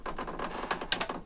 A sound of a creack on a wooden floor recorded on set for a short film.
This is one of the many, so check out the 'Creacks' pack if you need more different creaks.
Used Sony PCM-D50.